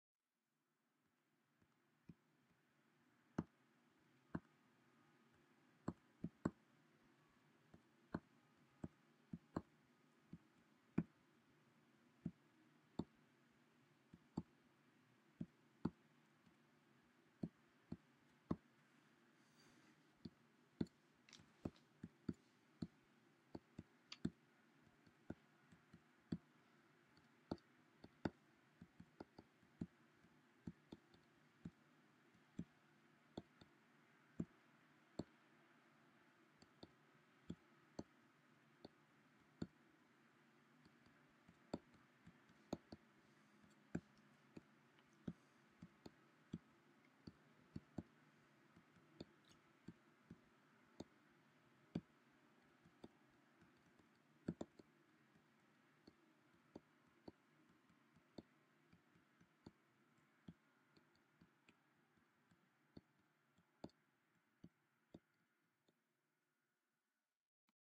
The sound of tapping on a MacBook Pro lightly. Recorded with a MacBook Pro microphone.
tapping, fnd112, f13